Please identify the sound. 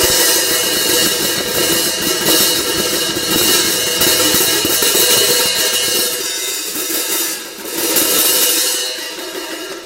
Sounds For Earthquakes - Shaking Hi-Hats
I'm shaking my hi-hat stand, the cymbals make noise. Recorded with Edirol R-1 & Sennheiser ME66.
collapse, collapsing, earth, earthquake, falling, hi-hat, hi-hats, iron, metal, metallic, motion, movement, moving, new-beat, noise, quake, rattle, rattling, rumble, rumbling, shake, shaked, shaking, shudder, stirred, stuff, stutter, suspense, waggle, zildjian